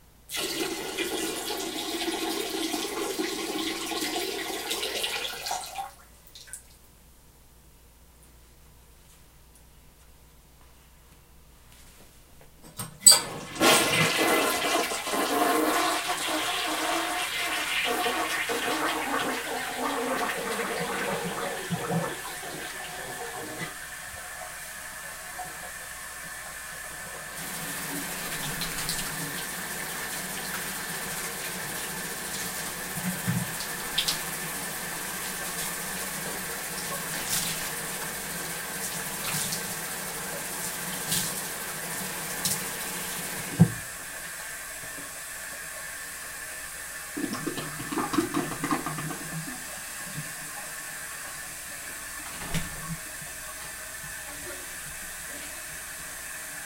A full bathroom sequence, short wiz, flush, handwashing.